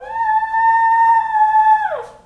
Shaggy is back and she can howl like anyone.